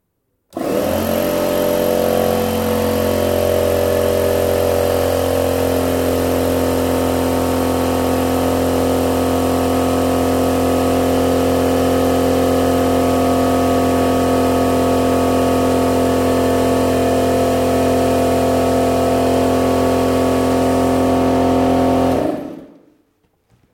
Electric air compressor distance mono
An electric air compressor running. Recorded with Sennheiser mkh 60 connected to an Zoom H6. Miked 5 meters from source to get some of the natural reverb.